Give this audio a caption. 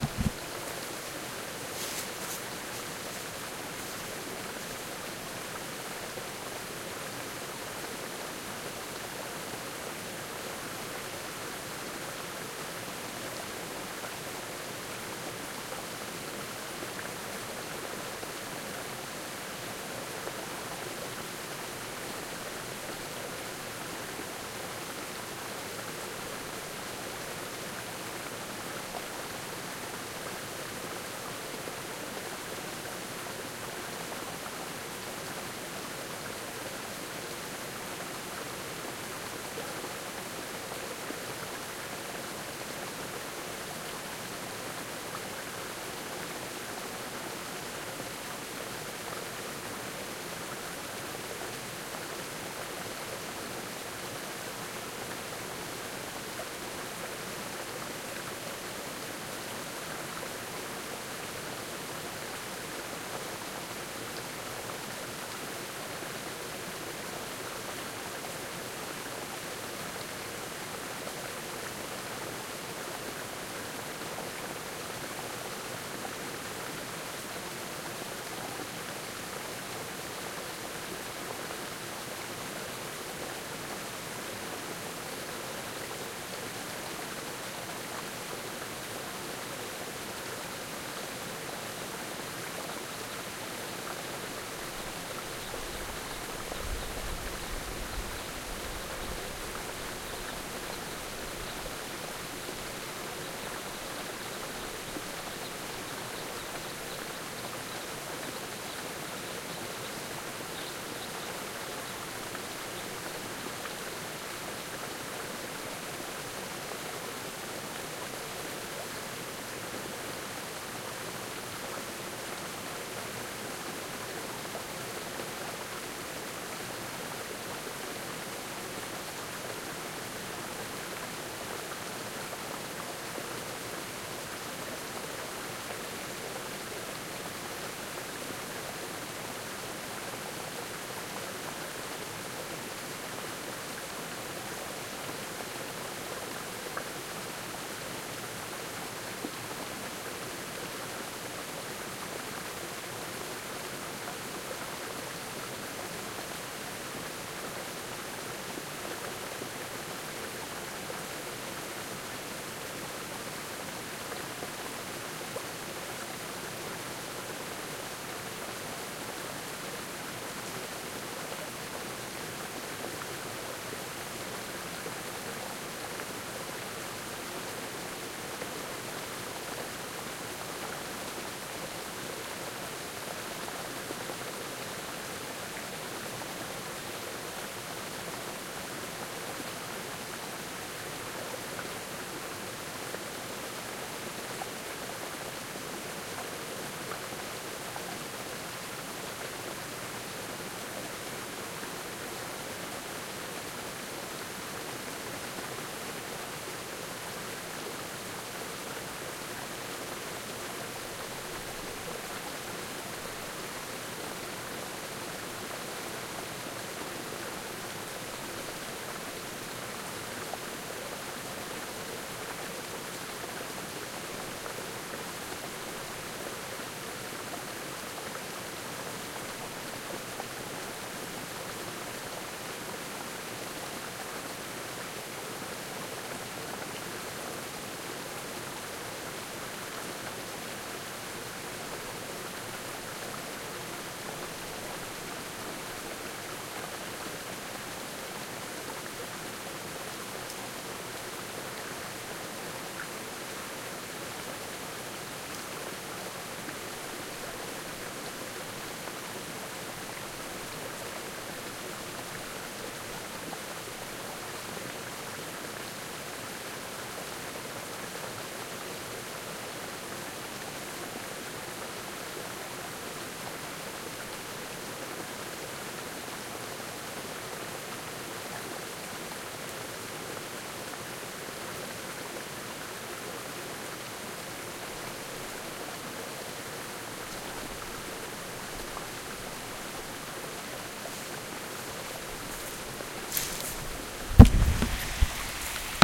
the sound of big stream in the mountains - front
field-recording, mountain-forest, river-waterfall, stream, water, woods